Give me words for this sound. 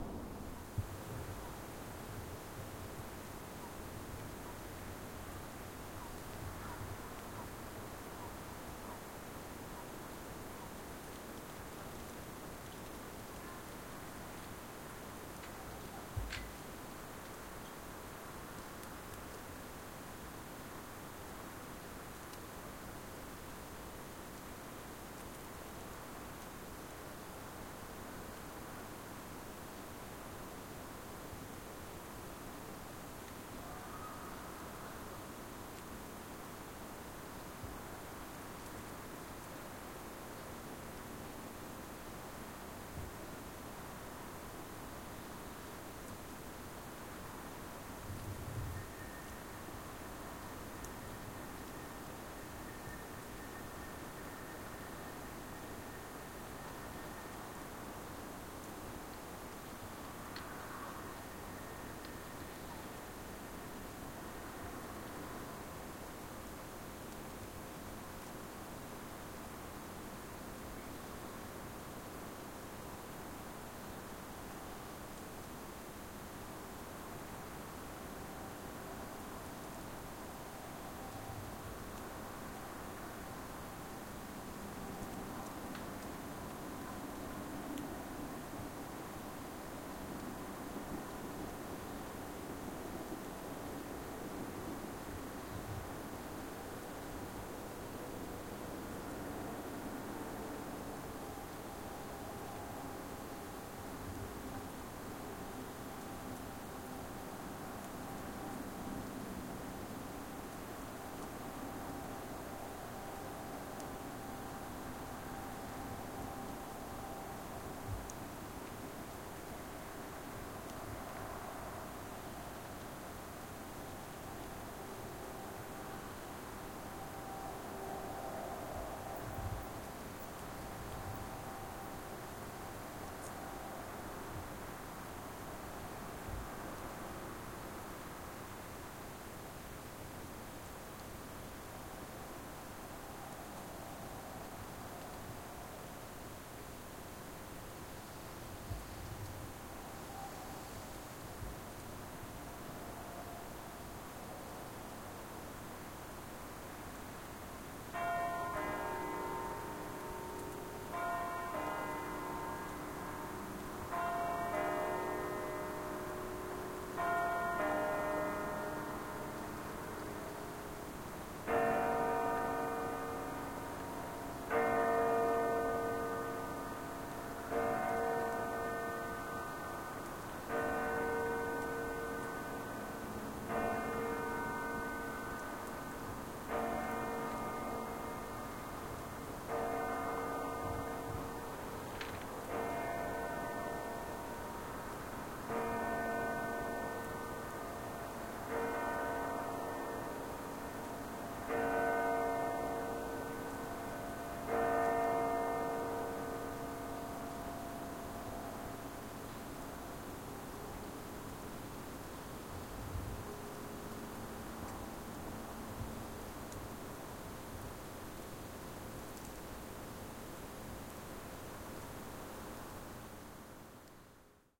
Night Atmo Churchbells
Atmo in a Village near Zurich at Night
Fieldrecorder, Feldaufnahme, Atmos, Ambi, atmosphere, Atmosphäre, Schoeps Microfons Mikrofone, Sound Devices 788T
twilight, Village, Bell, Kirchenglcke, Atmosphre, dusk, Church, Fieldrecording, Flugzeug, night, people, faraway, Outside, City, Atmos, churchbells, talking, Nacht, Dmmerung, frogs, Feldaufnahme, remote, far-off, Stadt, distant, far, Ambi, Abend, Frsche, atmosphere